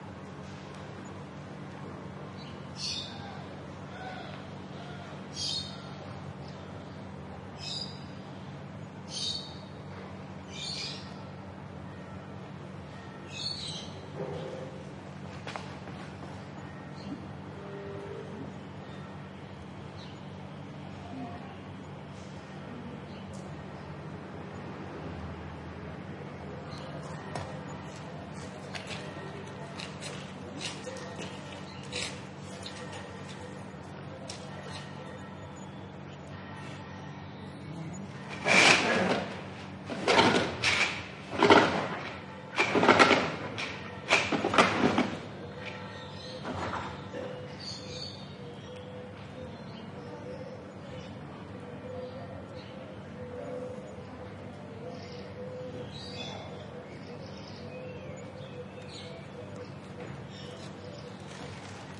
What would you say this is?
quiet street ambiance, some birds and a blind being raised. This is in sharp contrast with the harsh noise of companion files. Recorded during the filming of the documentary 'El caracol y el laberinto' (The Snail and the labyrinth) by Minimal Films. Sennheiser MKH 60 + MKH 30 into Shure FP24, Olympus LS10 recorder. Decoded to Mid Side stereo with free Voxengo VST plugin.